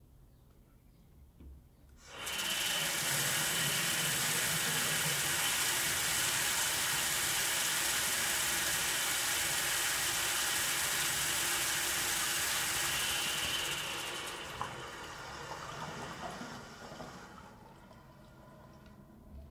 Bathroom Sink, Faucet, Medium
Bathroom sink faucet, recorded 2M away, with a Sennheiser MKH-416 and a SounddDevices USB Pre2